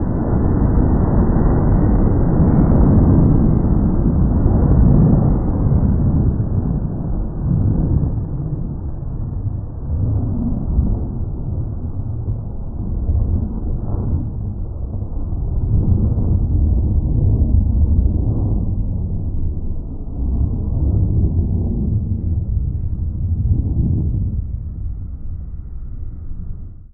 A far away rocket launching, softly.
Recorded with a Zoom H2. Edited with Audacity.
Plaintext:
HTML:
launch, flight, launching, craft, space, rocket, weapon, missile